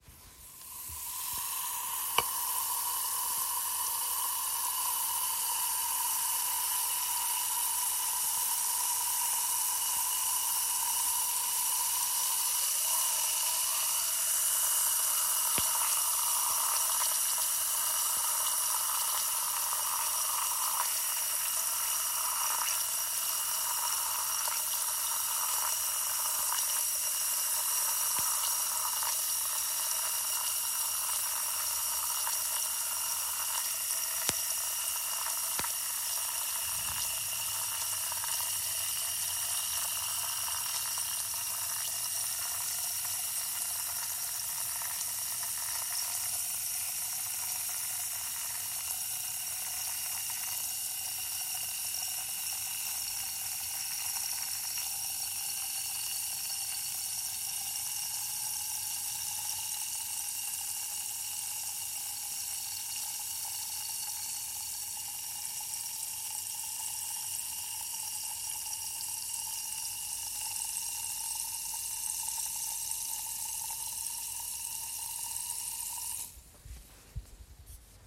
aspirin tablet dissolves in water
the sound of an aspirin tablet dissolving in water
aspirin, water, dissolves, tablet